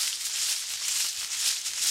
dried goat nipples stitched onto a string played into an akg 414 thru a focusrite red in 2000 actually 126.12 bpm